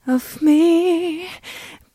Female Voc txt Of Me
Some short pieces of never released song